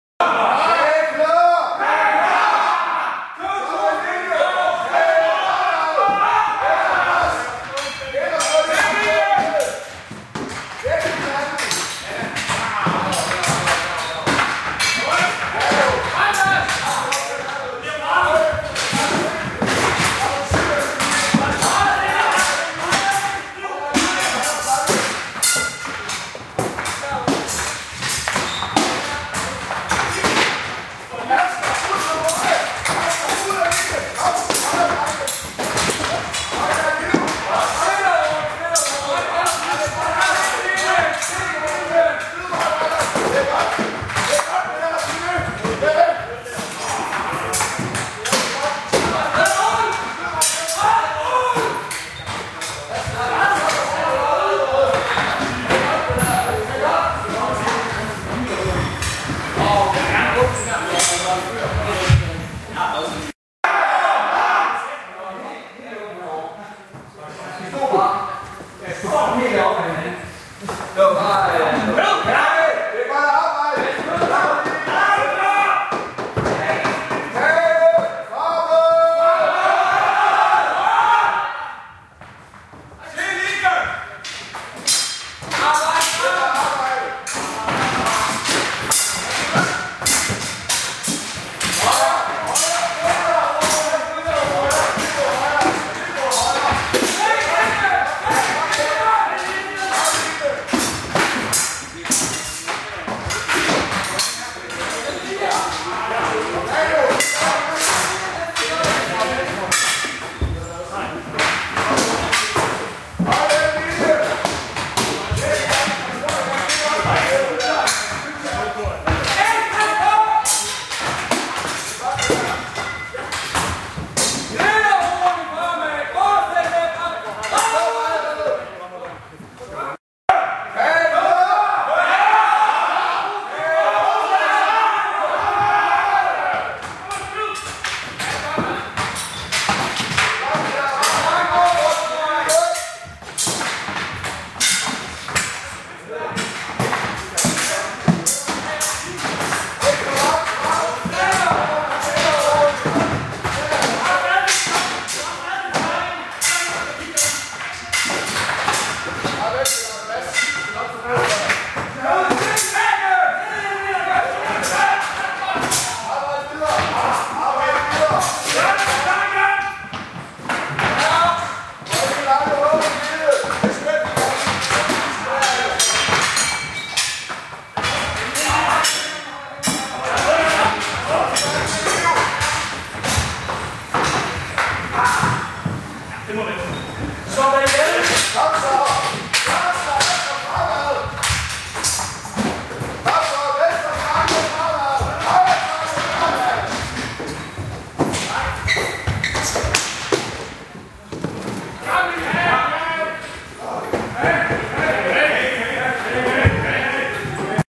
Viking reeunactors in Denmark during their weekly practice.